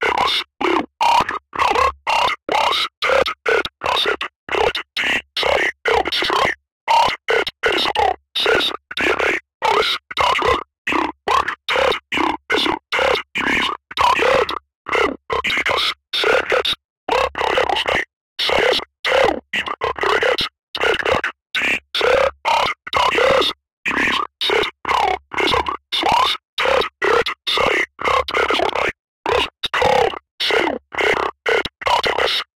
I processed a synthesised voice heavily and made a cool sound with it.